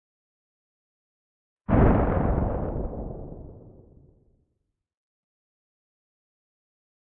Synthesized using a Korg microKorg